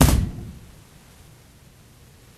Body Hitting StageProcessed
For "Man of La Mancha" I needed the sound of Don Quixote hitting the stage while off stage fighting windmills. I dropped a sandbag a few times, and added the sounds together. You can hear the slight reverb from our walls. I wanted the slight echo effect of the stage, so that the audience thought he really fell.